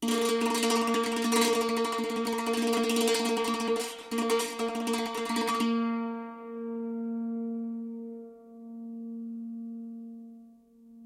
sant-roll-A#3
recordings of an indian santoor, especially rolls plaid on single notes; pitch is indicated in file name, recorded using multiple K&K; contact microphones
acoustic, percussion, pitched, roll, santoor